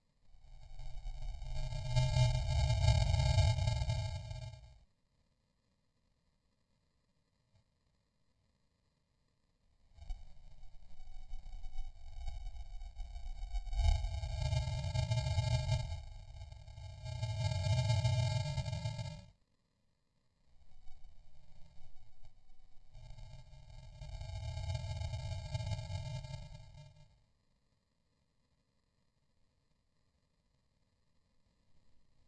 ovni respiratorio
comes and goes, good for transitions
sound, space